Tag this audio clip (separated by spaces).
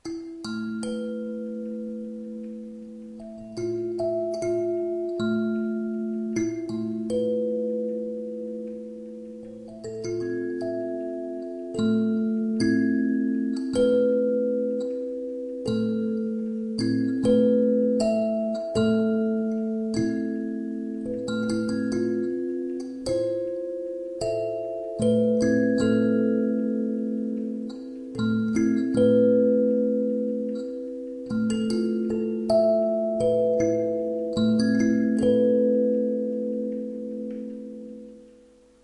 remo renaissance sansula thumb